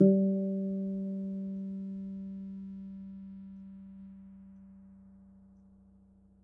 my mini guitar aria pepe